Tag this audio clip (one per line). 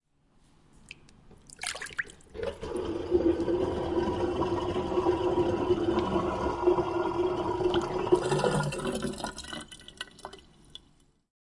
drain
gurgle
plughole
sink
water